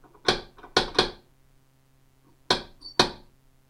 door knock 2
A simple door knock sound - 3 quick 2 slower. In response to a request from rogertudor.